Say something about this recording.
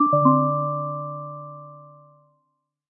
Weird Buttons App Game UI
ui, clicks, click, startup, sfx, event, mute, correct, gui, buttons, end, synth, win, application, blip, achievement, uix, puzzle, beep, game-menu, button, bloop, timer, menu, lose, bleep, game